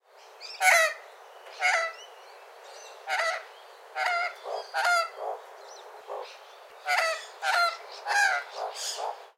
2 Magpies guarding their territory
A pair of Australian magpies (Gymnorhina Tibicen) standing on the roof of the house next door scan the horizon and make guarding noises. Recording distance ~ 5 meters. Recording chain: Rode NT4 (stereo mic) – Sound Devices Mix Pre (Pre amp) – Edirol R-09 digital recorder.